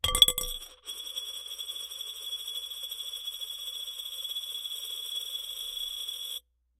coin twirl 4
Spinning a coin in a glass bowl until the coin comes to rest. Similar to "coin twirl 3" but the coin spins for much longer and sounds different. Recorded with a Cold Gold contact mic into Zoom H4.
coin, contact, floor, metal, spin, twirl